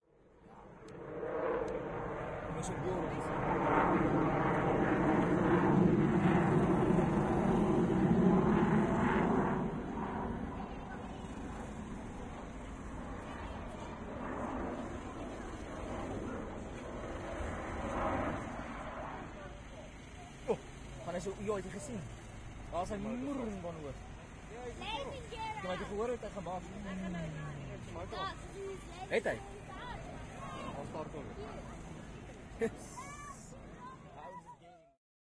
Gripen barrel wrole1
The SAAF Gripen performing a barrel role.
perform, wrole, hear-stopping, stunt